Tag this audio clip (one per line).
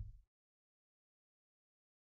dirty
drum
kick
kit
pack
punk
raw
realistic
tony
tonys